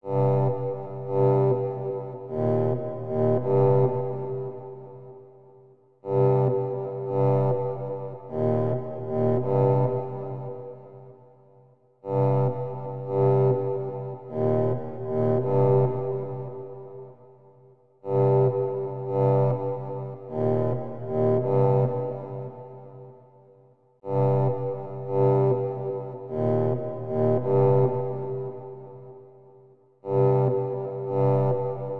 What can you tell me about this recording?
Like a melody from the anciant times, at 120 bpm on Reaktor.